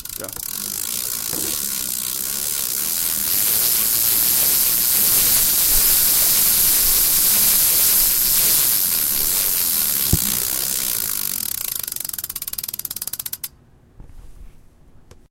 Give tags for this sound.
bike
buzz
chain
bike-chain
buzzing

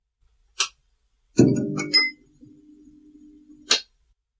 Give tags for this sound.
light
office
start

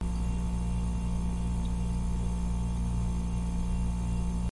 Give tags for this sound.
cold
freezer
frozen
ice
inside
kitchen
refrigerator

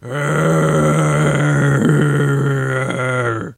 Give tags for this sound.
dead
zombie
brains

